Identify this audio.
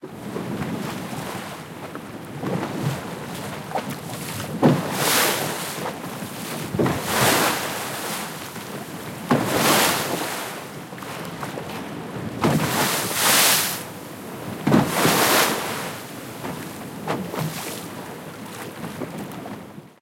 Boat waves 2
boat, ambience, sea, waves, field-recording